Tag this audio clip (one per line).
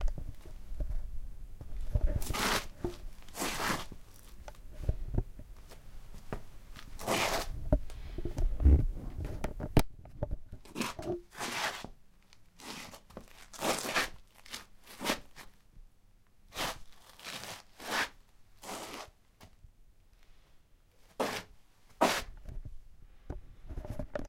floor
foot
london
house
scraps